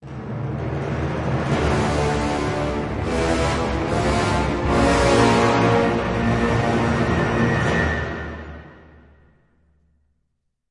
Dramatic evil theme orchestra
Dramatic short orchestral theme of villain, with low brasses that give it a dramatic effect, made with Studio One and Orchestral Tools libraries.
brass, cinematic, drama, dramatic, evil, fear, film, haunted, horns, horror, increasing, movie, movies, music, orchestra, orchestral, phantom, scary, sinister, soundtrack, strings, suspense, symphony, terrifying, terror, theme, thrill, thrilling, trombones